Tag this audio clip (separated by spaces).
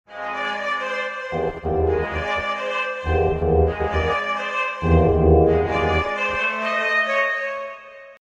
beyond strings